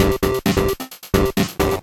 hesed&tks1(33)
drum, bend, 707